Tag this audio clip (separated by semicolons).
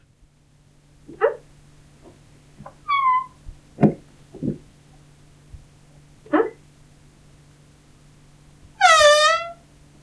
Squeak; Door; Squeaking; Creak